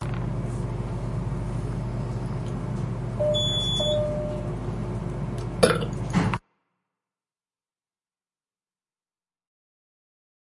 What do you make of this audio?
burp in an elevator
me burping getting off an elevator.
belch, burp, human